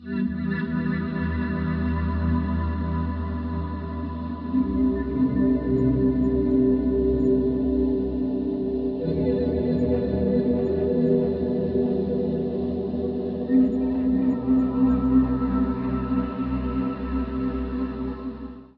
Playing with guitar rig